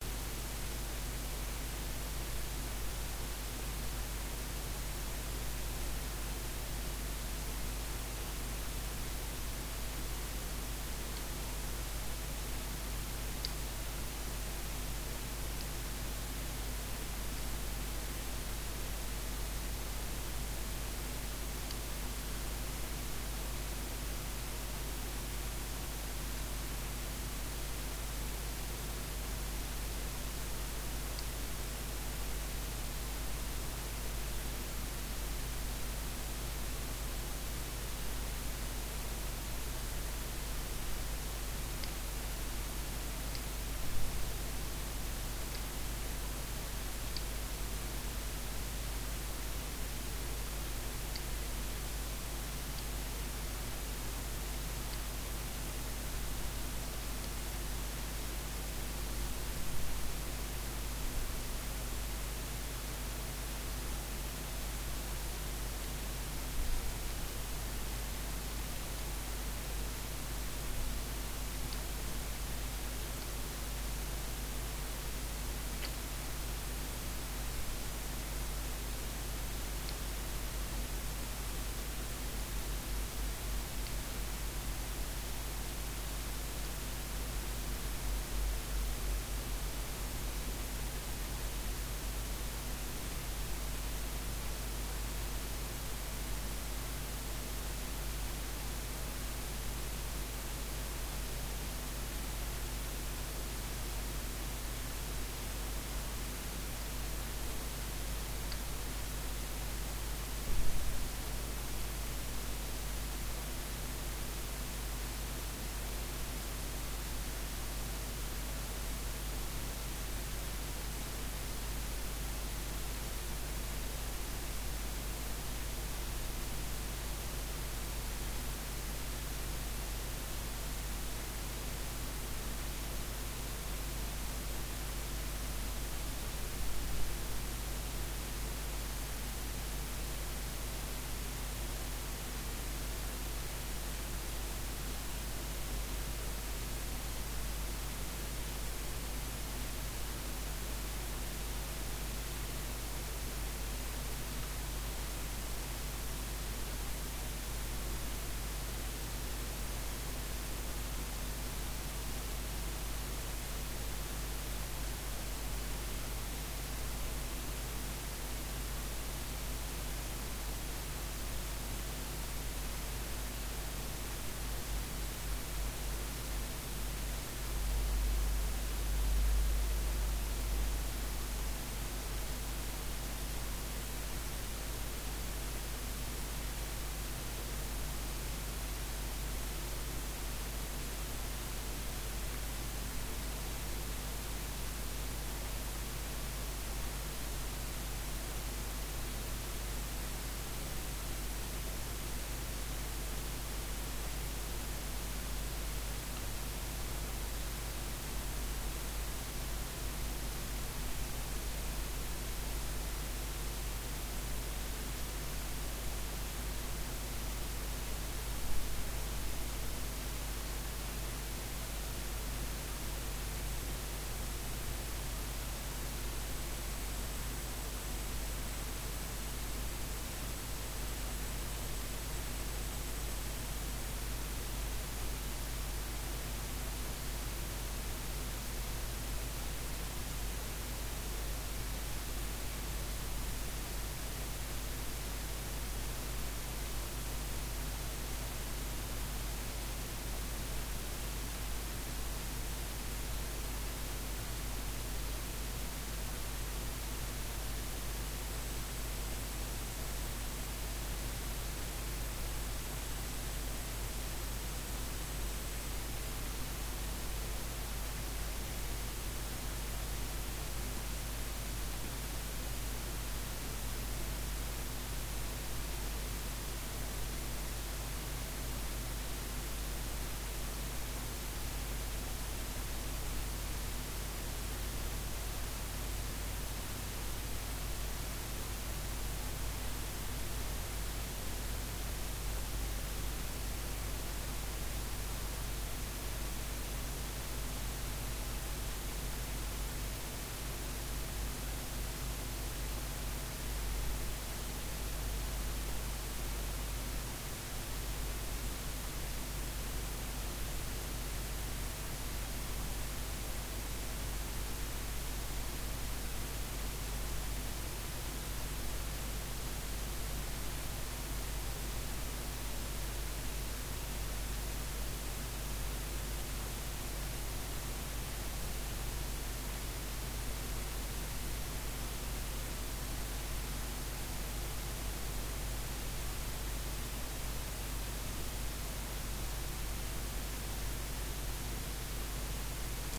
ECU-(A-XX)112
2, 3, ATV, Battery, Carrier, Channel, Control, ECU, Efficiency, Effort, Engine, Fraser, Iso, Jitter, Lens, Paradigm, Path, Power, Rack, Railway, Rheology, Root, Shelf, Super, Synchronous, Trail, Unit, UTV, Wavelength